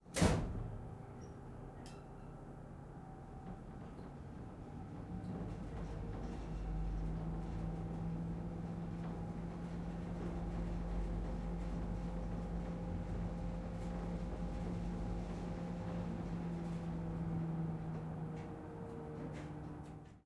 elevator travel 3
The sound of travelling in a typical elevator. Recorded in an apartment building in Caloundra with the Zoom H6 XY module.
moving, travelling, lift, elevator, mechanical